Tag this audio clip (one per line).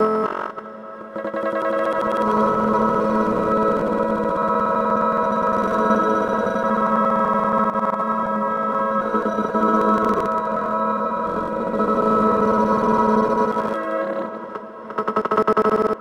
loop
electronica
glitchy
slow